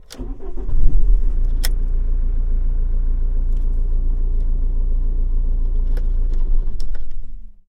sons cotxe motor dins 2011-10-19
car, field-recording, sound